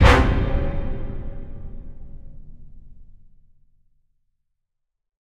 Cinematic Hit 2
A lot of effort and time goes into making these sounds.
An orchestral hit you might hear in a television show, movie, radio play, etc. I personally imagine hearing this when a vengeful hero lands a heavy blow on their sworn enemy.
Produced with Ableton.